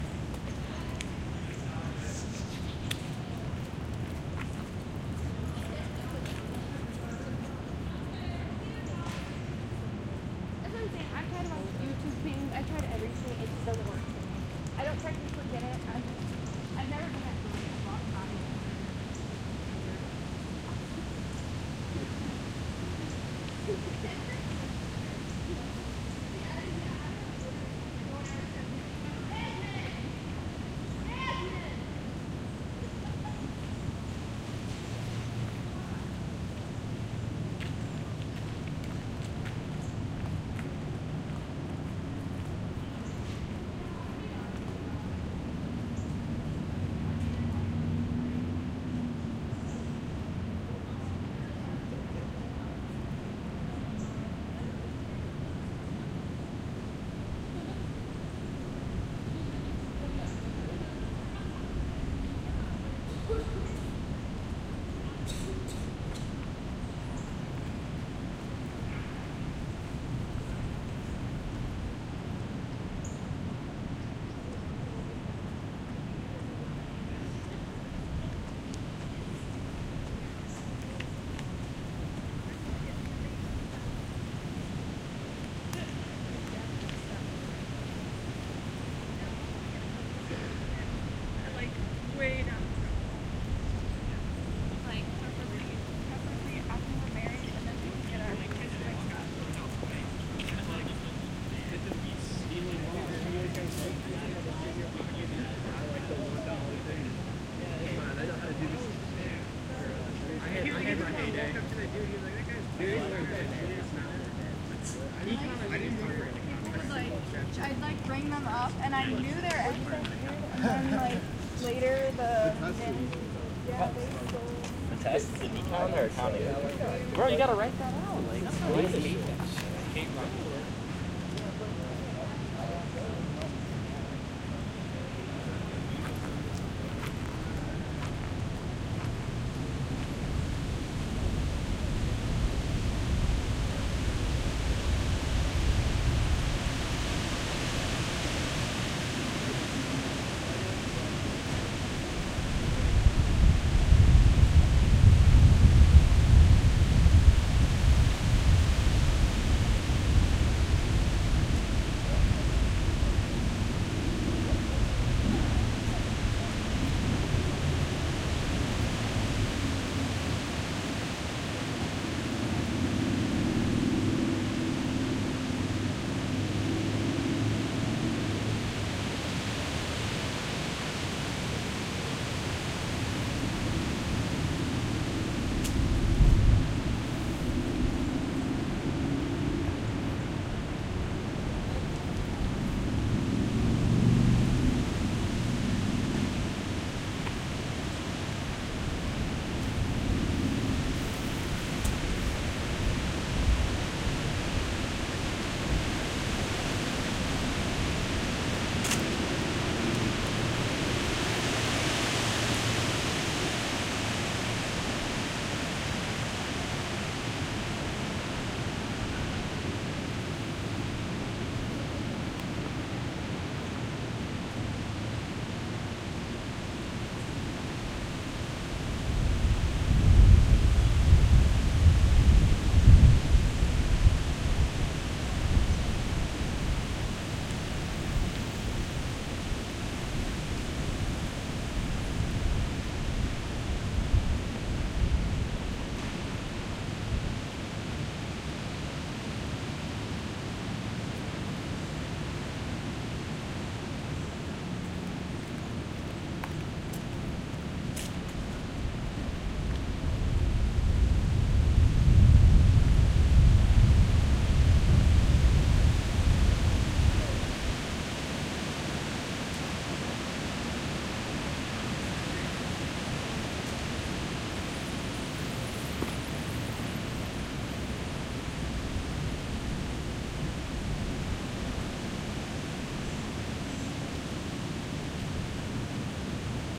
A wonderful background of a busy college campus during finals week. I love how the scattered fragments of conversation show inspiration in young people. You will also hear some beautiful wind, footsteps, and some recently arrived spring migrants who are just as excited about the new semester and the humans.
Recorded in April of 2017 in a beautiful wooded walking path in the middle of a major Midwest University. Listen, enjoy, and think back to the times when we were young, and excited about the future....about learning new things....
I find this snapshot quite inspiring and invigorating.
Recorded with Sound Devices 702 and the AMAZING Audio Technica BP4025 STEREO MICROPHONE.